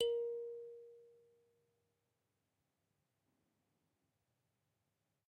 I sampled a Kalimba with two RHØDE NT5 into an EDIROL UA-25. Actually Stereo, because i couldn't decide wich Mic I should use...
african, bb, kalimba, note, pitch, short, sound, unprocessed